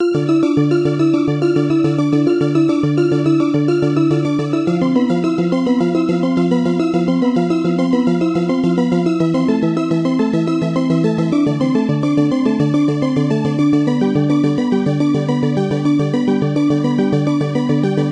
a synth-pop inspired arpeggio, made with the subtractor frmo reason.
arpeggio, electric, synth